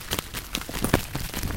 Sound of a footstep on deep seaweed
crackle, foot, seaweed